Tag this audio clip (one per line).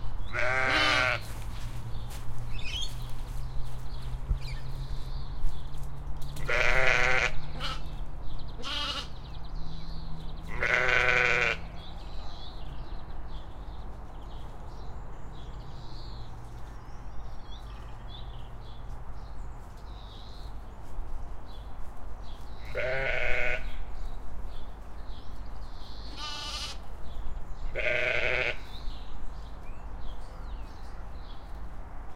field
sheep